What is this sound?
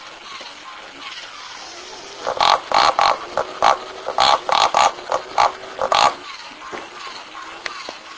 Pig Toy
This is a toy pig snorting and walking mechanically.
pig, snort